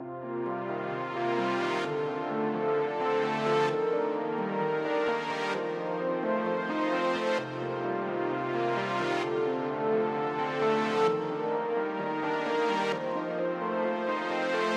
feel good
melody, positive, synth